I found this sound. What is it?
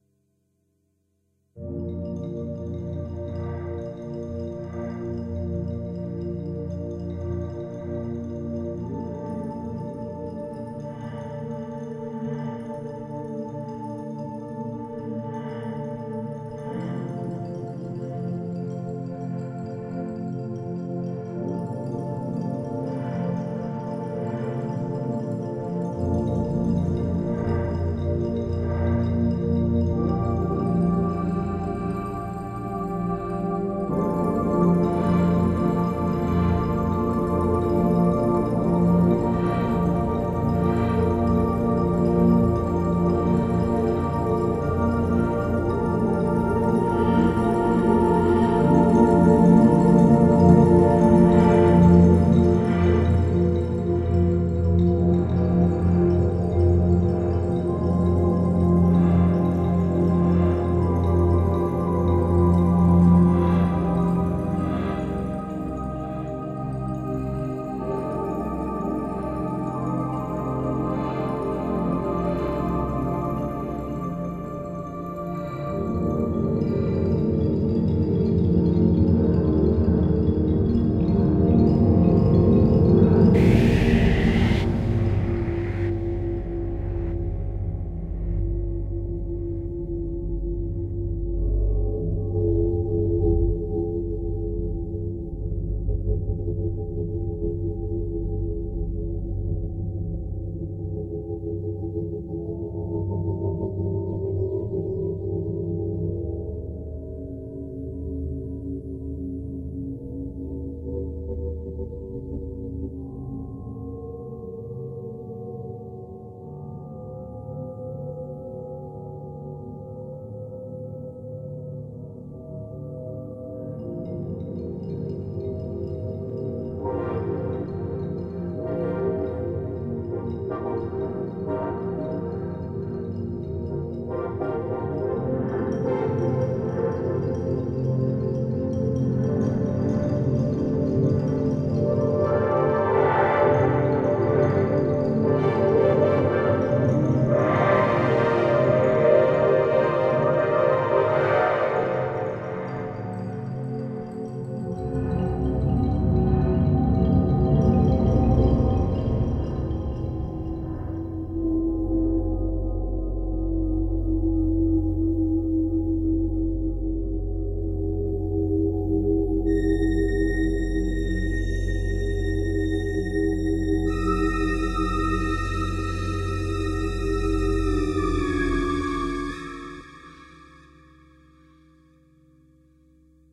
Soundscape Breathless 01
Made with Roland Jupiter 80.
soundscape, synth, atmospheric